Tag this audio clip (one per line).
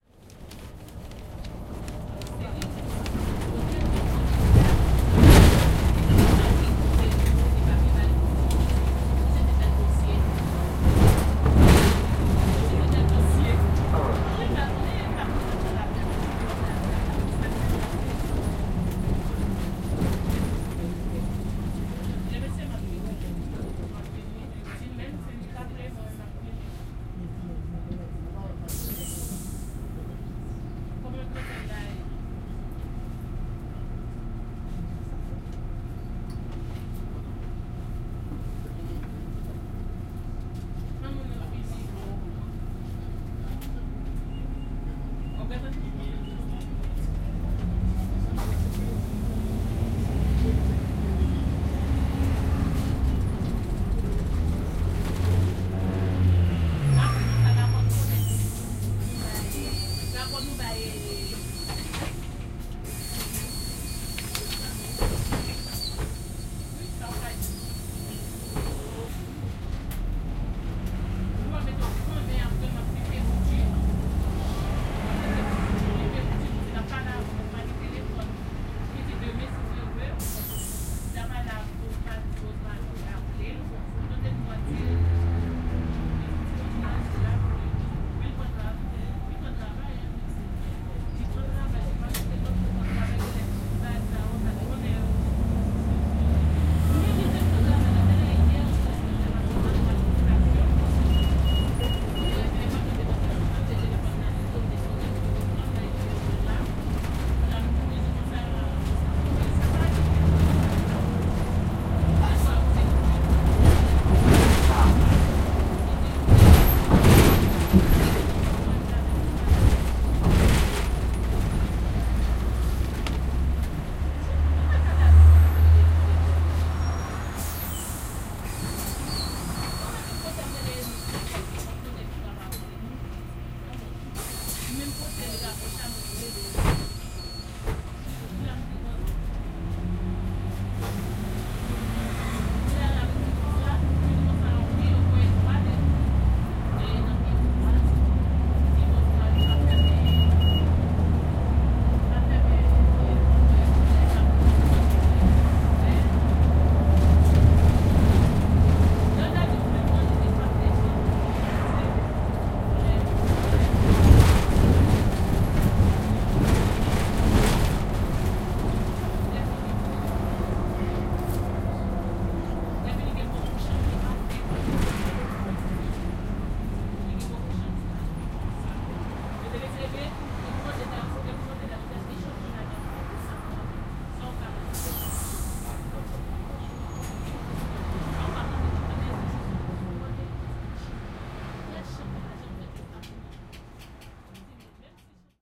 inside-a-bus
RATP
field-recording